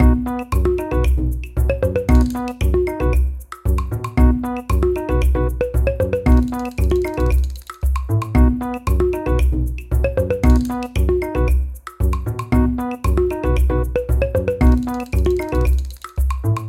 Shaky Platforms
Can you reach the top? Some of these platforms look very unstable!